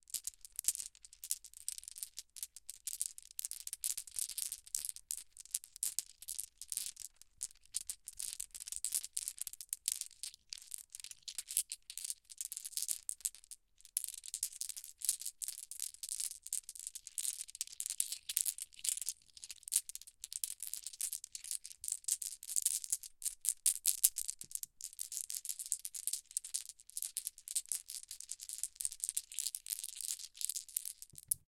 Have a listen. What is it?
Moving marbles around in my hands. Recorded with a Tascam DR-40